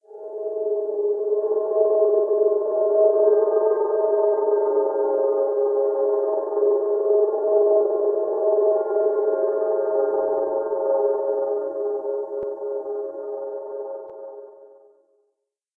Patch #?? - Higher pitched version of Organ B2. >> Part of a set of New Age synths, all made with AnologX Virtual Piano.